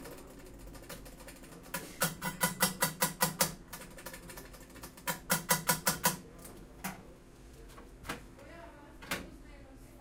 golpes cafetera 1
shop, bar, coffe, things